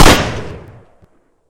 Layered Gunshot 4
One of 10 layered gunshots in this pack.
layered, cool, awesome, shoot, pew